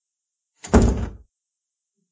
Door closed 2
the sound of closing door
close, closing, closing-door, door, door-closed, doors, door-shut, shut